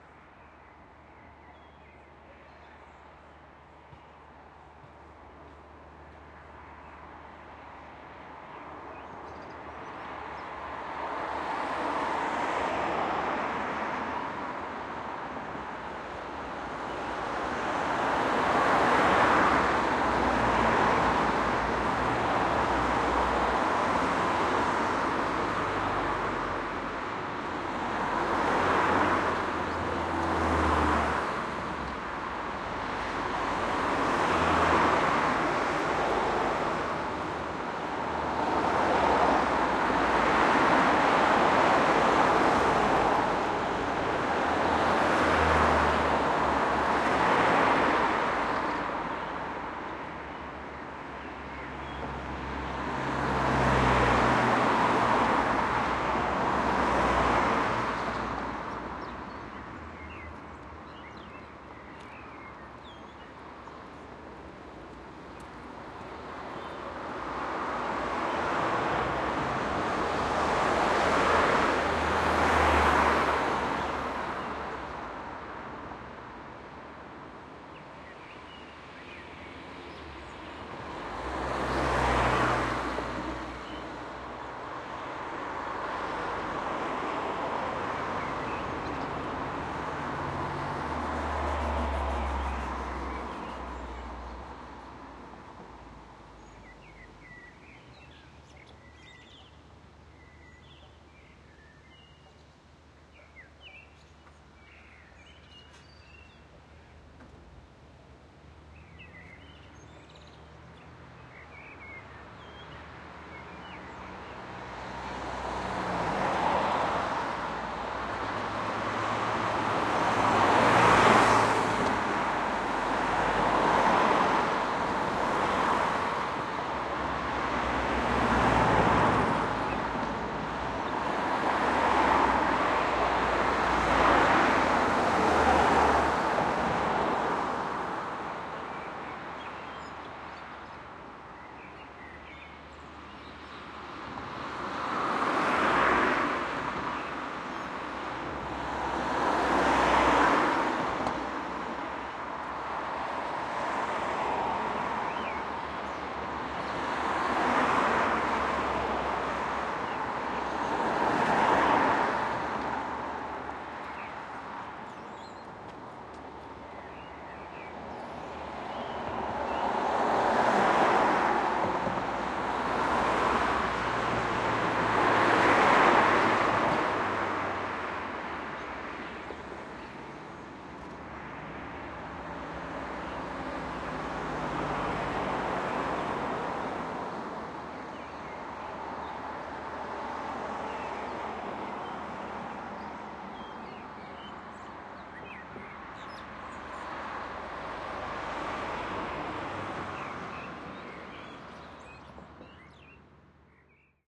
ambience Vienna street Handelskai cars pass by people walk birds
Ambience recording on the street "Handelskai" in Vienna, Austria.
Recorded with the Fostex FR2-LE and the Rode NT-4.
ambience, ambient, atmo, atmosphere, austria, birds, cars, city, field-recording, handelskai, pass-by, people, sterreich, street, traffic, vienna, walk, wien